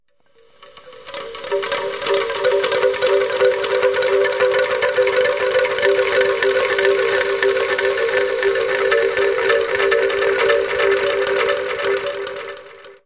Bamboo Windchimes with Delay added and one Layer paulstretched
delay, paulstretch, windchimes